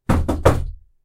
Three Door Knocks
bits, three, knocks, knocking, two-bits, 3, wood, door, knock, two, slam, closed, bang, hit, knuckles, wooden